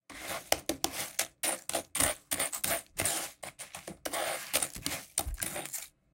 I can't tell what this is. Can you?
Cracker Foley 3 Close
Graham cracker foley recorded with a pair of mics in XY stereo arrangement (close), and small diaphragm condenser mic (far) running parallel. Processed in REAPER with ambient noise reduction, compression, and EQ. Each file mixed according to the title ("far" or "close" dominant).
cookie, cookies, cracker, crackers, crumble, crumbles, crumbling, design, dry-bread, dust, dusting, effects, foley, food, foods, footstep, gamesound, gingerbread, graham, pop, sfx, sound, sound-design, sounddesign, step, steps